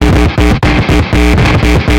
ground loop 8
created by shorting 1/4' jack thru a gtr amp
120bpm, buzz, ground, loop